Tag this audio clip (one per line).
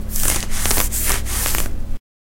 itch
itching
sound